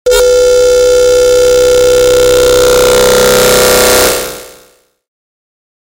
Harsh FM World 8
ΑΤΤΕΝΤΙΟΝ: really harsh noises! Lower your volume!
Harsh, metallic, industrial sample, 2 bars long at 120 bpm with a little release, dry. Created with a Yamaha DX-100
120-bpm, 2-bars, DX-100, FM-synthesis, Harsh, industrial